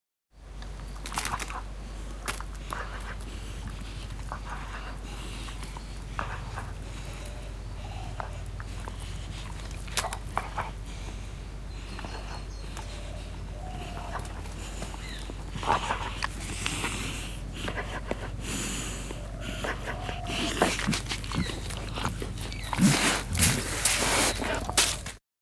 Dog Itching
All the best.
Dharmendra Chakrasali
Dog, itch, Field-Recording